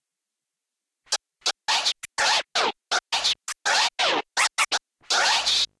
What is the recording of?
Scratch Fresh 5 - 2 bar - 83 BPM (swing)
Acid-sized sample of a scratch made by me. Ready for drag'n'drop music production software.
I recommend you that, if you are going to use it in a track with a different BPM, you change the speed of this sample (like modifying the pitch in a turntable), not just the duration keeping the tone.
Turntable: Vestax PDX-2000MKII Pro
Mixer: Stanton SA.3
Digital system: Rane SL1 (Serato Scratch Live)
Sound card on the PC: M-Audio Audiophile 2496 (sound recorded via analog RCA input)
Recording software: Audacity
Edition software: MAGIX Music Maker 5 / Adobe Audition CS6 (maybe not used)
Scratch sound from a free-royalty scratch sound pack (with lots of classic hip-hop sounds).
hip-hop, scratching, acid-sized, hiphop, turntable, scratch, dj, 90, scratches, golden-era, classic, rap, s